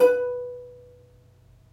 Notes from ukulele recorded in the shower far-miced from the other side of the bathroom with Sony-PCMD50. See my other sample packs for the close-mic version. The intention is to mix and match the two as you see fit. Note that these were separate recordings and will not entirely match.
These files are left raw and real. Watch out for a resonance around 300-330hz.